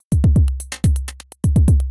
Rhythmmakerloop 125 bpm-50
This is a pure electro drumloop at 125 bpm
and 1 measure 4/4 long. A variation of loop 49 with the same name. This
time the kick gets another groove. It is part of the "Rhythmmaker pack
125 bpm" sample pack and was created using the Rhythmmaker ensemble within Native Instruments Reaktor. Mastering (EQ, Stereo Enhancer, Multi-Band expand/compress/limit, dither, fades at start and/or end) done within Wavelab.
electro, drumloop, 125-bpm